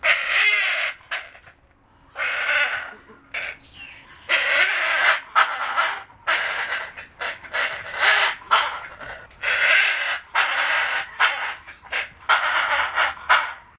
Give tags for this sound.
bird
malaysian-black-hornbill

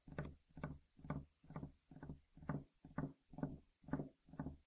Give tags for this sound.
foley
tap
tapping
fingers-tapping

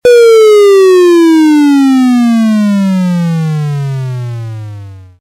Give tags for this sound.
arcade
lose-life
8-bit
mario
video-game

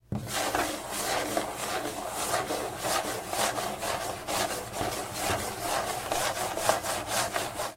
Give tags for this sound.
rail
train
passing

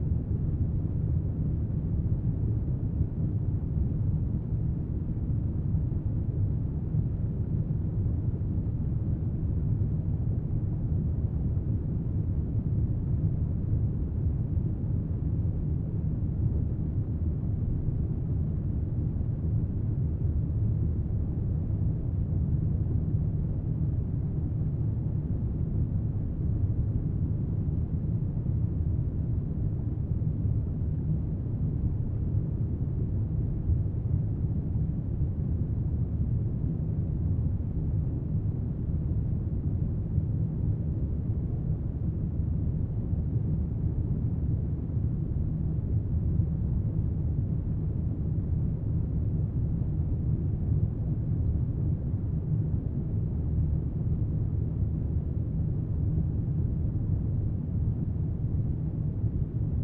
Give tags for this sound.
ventilation
constant
tonal
wind
tunnel
low

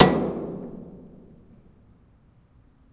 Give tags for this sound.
high-quality
percussion
city
urban
industrial
field-recording
metallic
metal
clean

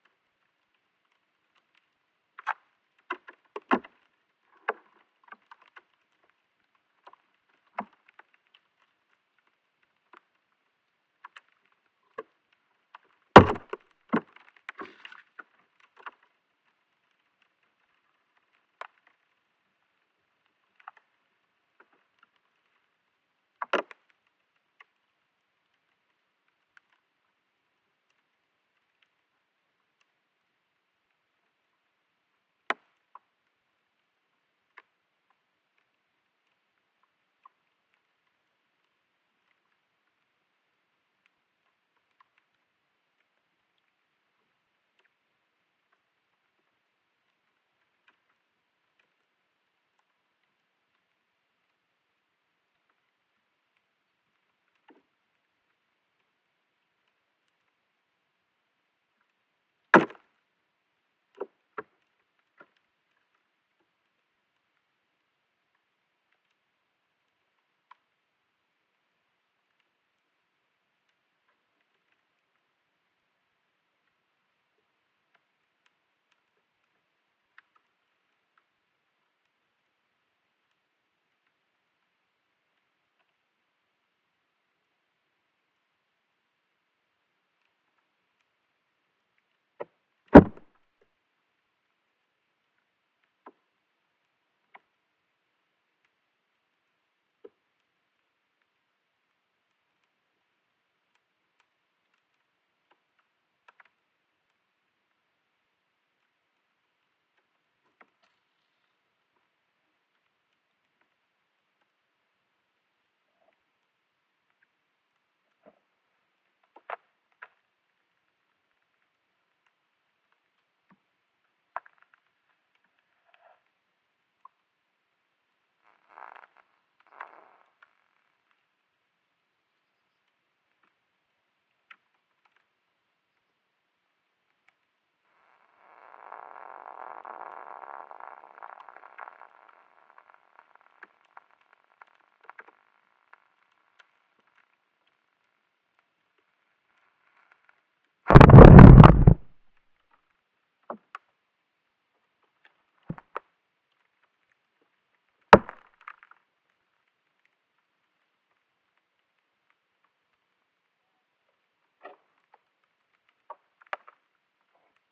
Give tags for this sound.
craking
field-recording
ice

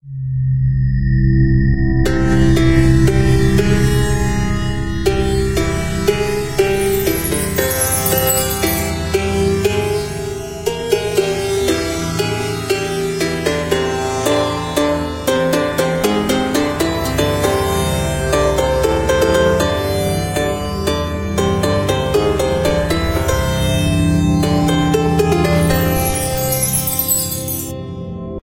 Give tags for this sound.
folk
sitar

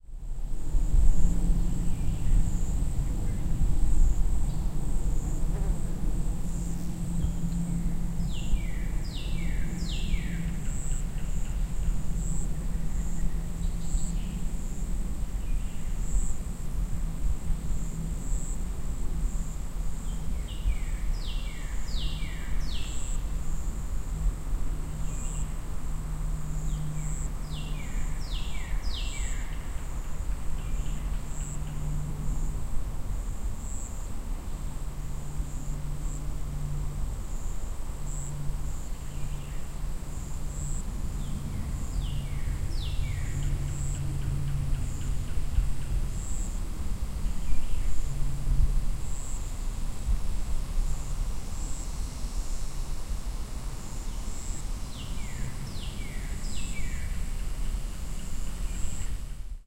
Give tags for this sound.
ambiance
ambience
day
florida
insects
life
nature
wildlife

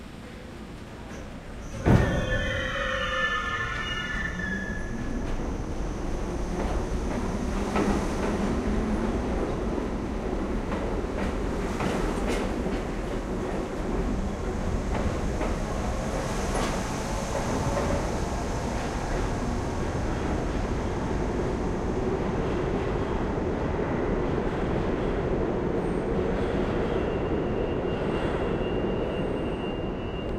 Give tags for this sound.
subway
field-recording
NYC
H4n
Zoom
MTA

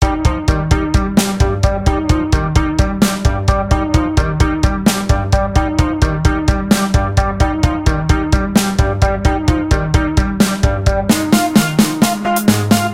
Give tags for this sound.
audio-library
download-free-music
electronic-music
free-music
free-music-to-use
loops
music
music-for-vlog
syntheticbiocybertechnology
vlogger-music

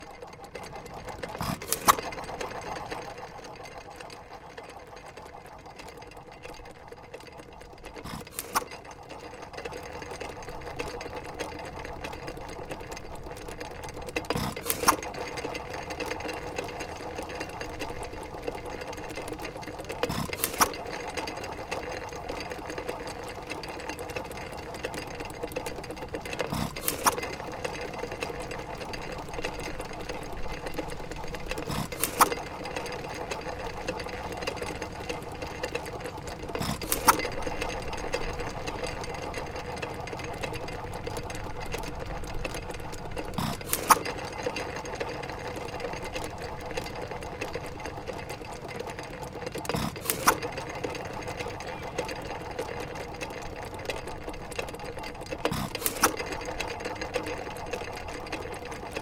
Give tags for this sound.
hissing
spinning
single-cylinder
popping
one-cylinder
gasoline-engine

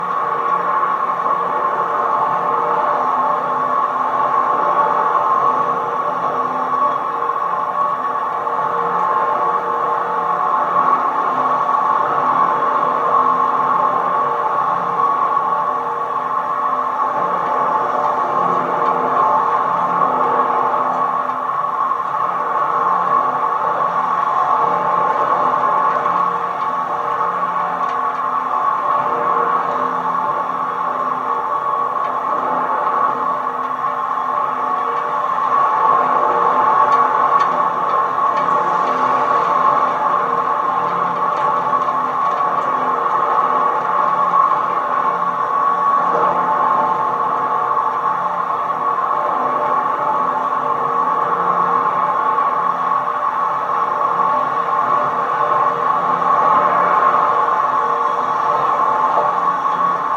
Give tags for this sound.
bridge
contact
contact-mic
contact-microphone
DR-100-Mk3
DYN-E-SET
field-recording
Golden-Gate-Bridge
lamp
lamppost
metal
mic
post
San-Francisco
Schertler
steel
Tascam
wikiGong

